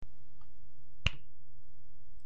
Literally me snapping.
clap, click, clock, Snap